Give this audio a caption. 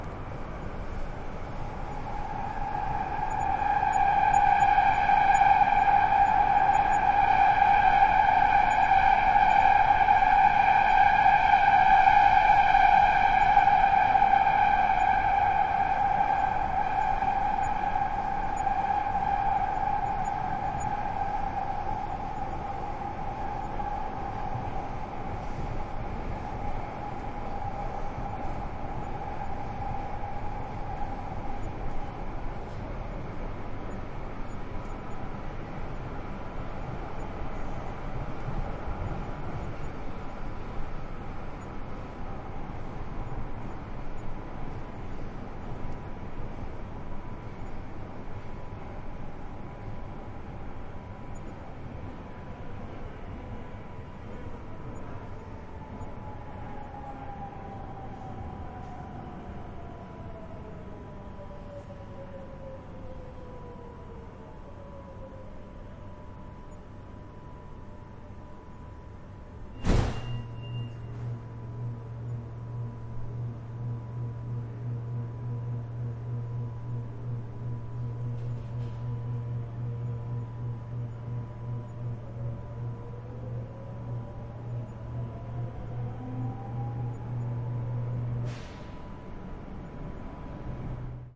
Metro trip 3
Madrid metro trip. Recorded with Soundfield SPS200, Sound Devices 788T, converted to stereo by Harpex-X